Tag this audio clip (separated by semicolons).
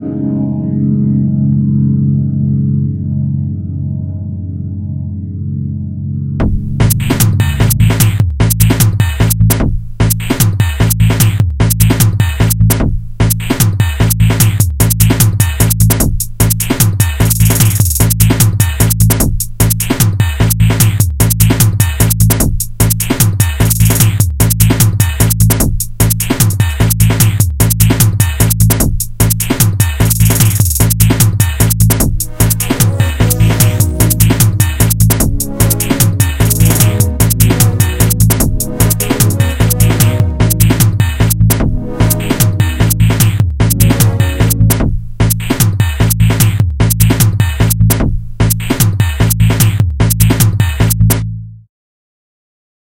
150; bass; beat; drum; loop; sample